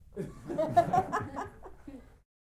Small group of people laughing 4
Small group of people chuckling.
Recorded with zoom h4n.
laughs, group, human, people, laughing, chuckle, male, laugh, female